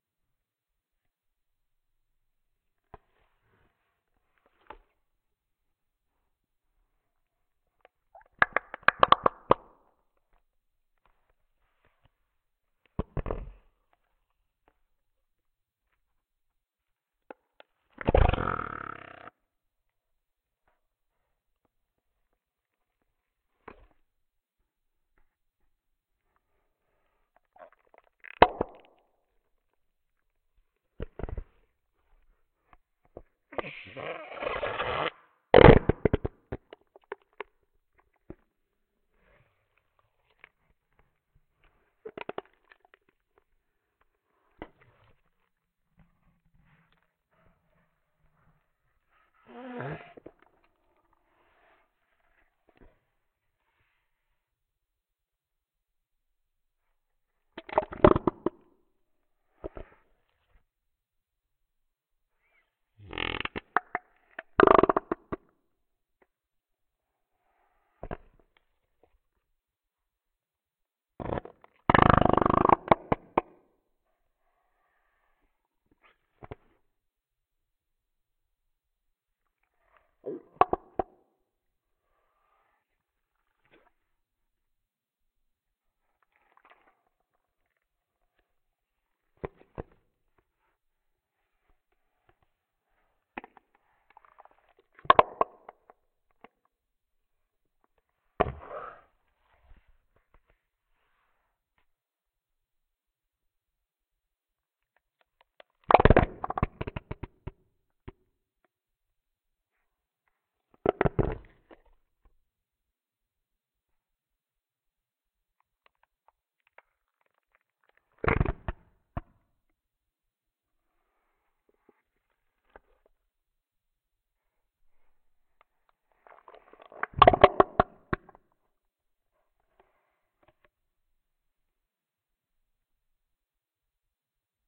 Squeezing slimey, pitched down, quiet studio recording.